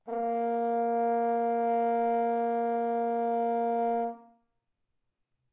horn tone Bb3
A sustained Bb3 played at a medium volume on the horn. May be useful to build background chords. Recorded with a Zoom h4n placed about a metre behind the bell.
bb, tone, horn, b-flat3, bb3, b-flat, note, french-horn